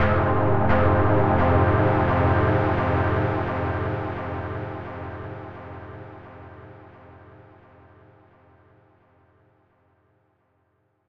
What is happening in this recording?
chord in sylenth1